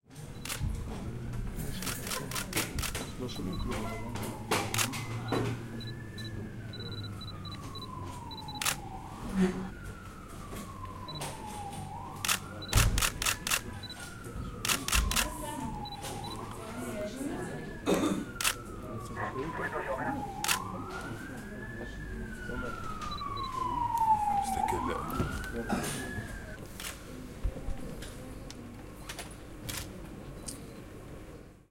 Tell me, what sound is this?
Camera clicks in Israeli Court
Photographers taking photos inside a court in Israel. Sirens from distance. Some Hebrew words
camera-clicks, siren, Israel, Hebrew, journalist, court